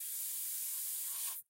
This sound can be used in conjunction with the steam loop in this pack to simulate a stream of steam being turned off.